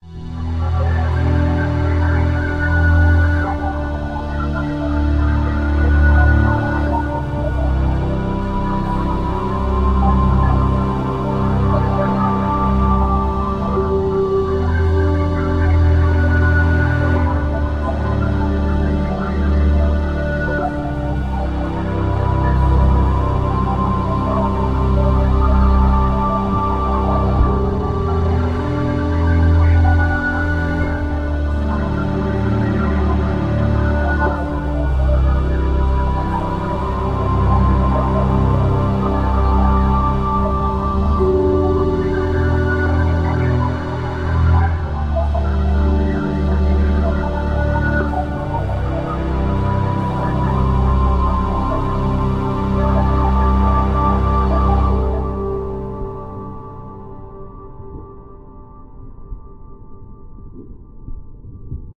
The Off-World 2049
Blade Runner Inspired Pack.
Futuristic - Space Vibes - Melancholic
[1] This loop was Made from scratch In Fl studio.
[3] Comment for more sounds like this!
Make sure to credit and send me if you end up using this in a project :)!